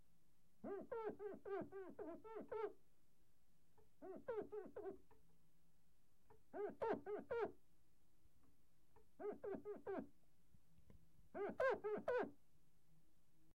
Glass Squeaking
rubbing, squeaking